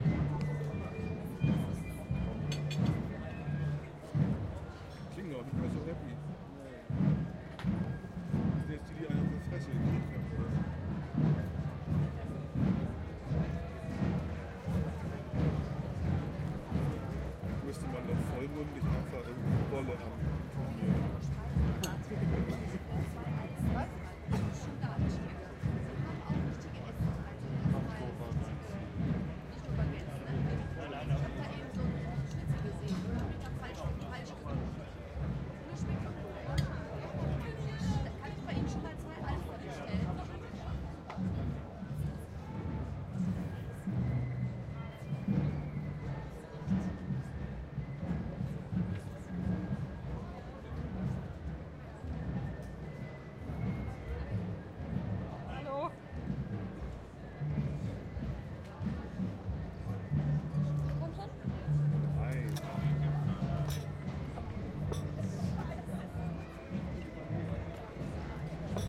STE-015 minden market square b.o.m.b and bolle
stereo field recording at minden market square during the "250 years battle of minden" festival. a marching band passes over, voices of people in cafe set up the ambiance. two voices commenting the scenery. slightly disgusted, they propose chanting a rude berlin folk song. event recorded with zoom h2. no postproduction.